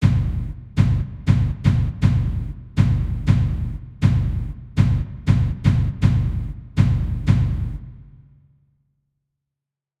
FORF Drum Main Theme Perkusja 01
drums, percussion, percussion-loop